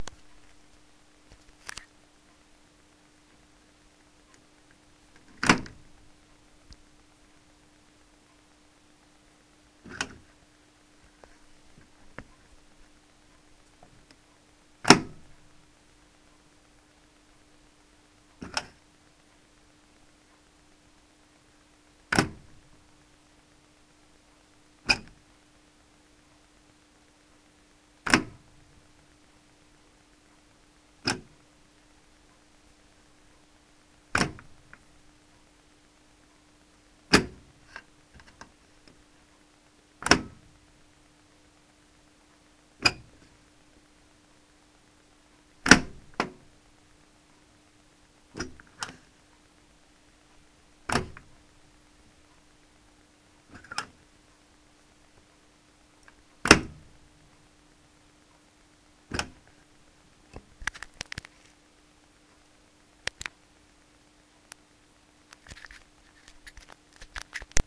A dishwasher door being latched and unlatched several times.